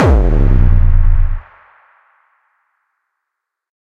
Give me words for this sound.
Heaviest Motherfucking Kick
I made this Gabber-esque kick in my free time, spent a lot of time adjusting it to make it the heaviest as possible.
bass, distorted, extreme, gabber, gritty, hard, hardcore, hardstyle, heavy, intense, kick, raw